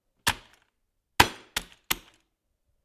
Mlácení do klávesnice
Sound, which you can hear, when somebody has got problems with PC